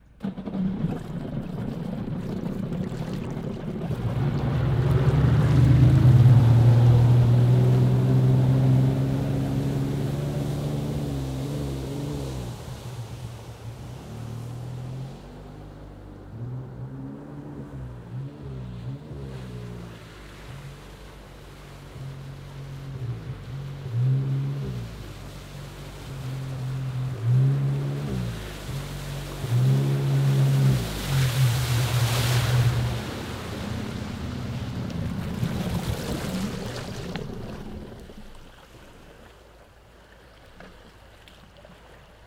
Jet-ski being started on a lake in Florida. Microphone on dock directed at jet--ski, jet-ski driven fast away for about 75 yards, slowly turned around, driven back to shore at more moderate speed. Engine turned off when it reaches shore, waves from jet-ski also heard. (when jet-ski reaches shore it is off axis for the microphone). Seadoo 2 stroke engine. Rode NTG-2, Sound Devices Mix-pre, Zoom H4n